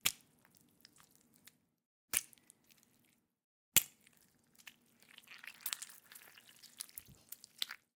Impacts Slushy Orange 001
A series of slushy, splattery impacts made by punching watermelons. Great for fleshy, crunchy, disgusting moments!
gush, watermelon, human, flesh, crunch, slush, splatter, guts, splat, splash, fruit, impact, gross, blood, punch, bones